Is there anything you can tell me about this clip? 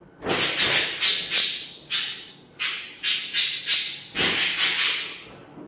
The sound of a Budgerigar, captured by the microphones and audio system of an AG-AF100 video camera and normalized to -6 db.
animals, bird, budgerigar